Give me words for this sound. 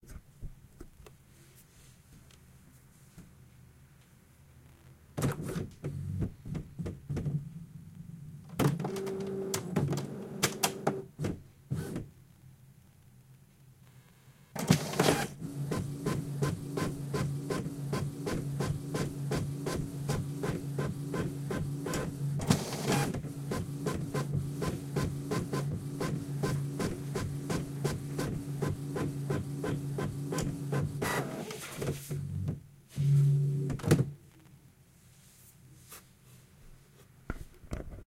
Jet Page Printing
Page printed with jet-ink printer
Please check up my commercial portfolio.
Your visits and listens will cheer me up!
Thank you.
jet, printer, page, ink, field-recording, jet-printer, printing-page, printing